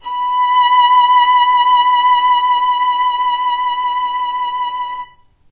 violin arco vib B4
violin arco vibrato
vibrato, violin